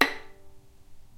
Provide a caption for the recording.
violin pizz non vib B5
violin pizzicato "non vibrato"
non-vibrato, pizzicato, violin